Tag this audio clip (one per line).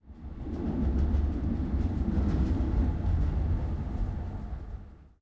CZ
Czech
Pansk
Panska
Tram
Tunnel